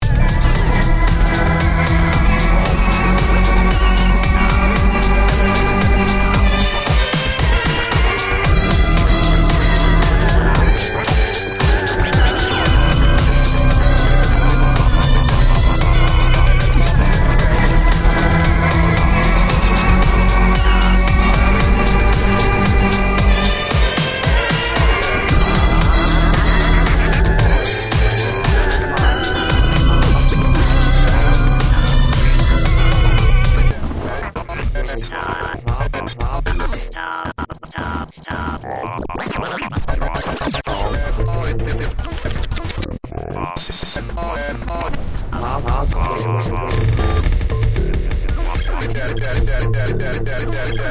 A short loop cut from one of my original compositions. 114 BPM key of E minor. Other than the drums and robot voices I did this all just with my own voice.
BPM, Drums, Robotic, Voice